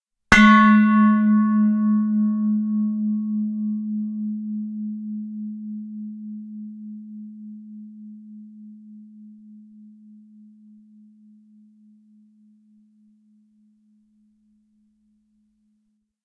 Stainless Steel Bowl 2
A stainless steel bowl struck with a wooden striker.
bell, ring, ding, bowl, stainless-steel, percussion